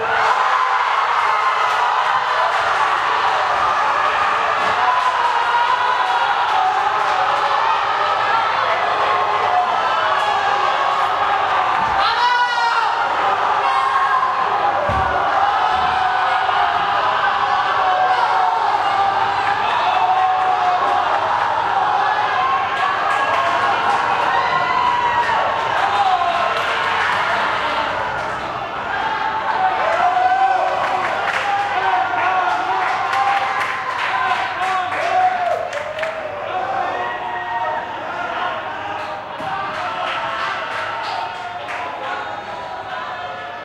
20100711.worldcup.08.goal
people shouting as Spain scores during the 2010 FIFA World Cup final (Spain-Netherlands). Sennheiser MKH60 + MKH30 into Shure FP24 preamp, Olympus LS10 recorder
competition, fans, field-recording, football, game, goal, match, shouting, soccer, spanish, sport, voice, world-cup